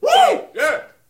Woo 1 just guys
Just a few macho guys yelling "woo! yeah!"
cheering, group